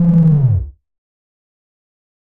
Attack Zound-04
Similar to "Attack Zound-03" but lower in pitch. This sound was created using the Waldorf Attack VSTi within Cubase SX.
electronic, soundeffect